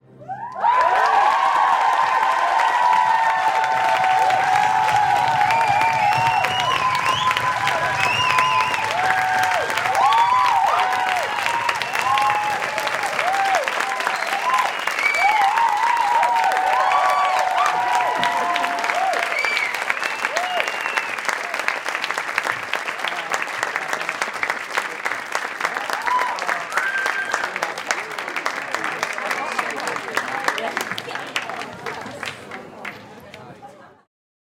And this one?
Applause, enthusiastic, with cheering and some foot stamping 2
applause, Audience, cheering, enthusiastic, foot, people, stamping